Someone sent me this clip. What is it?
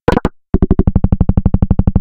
Transition From Loading Screen Into FPS Game
UI sound effect. On an ongoing basis more will be added here
And I'll batch upload here every so often.
From, SFX